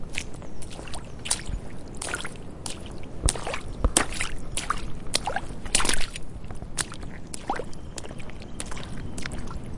Water splashes from child stamping in puddle
Unprocessed audio recorded with a Tascam DR-22WL with a Rycote softie of 6 year old splashing through a shallow puddle in boots.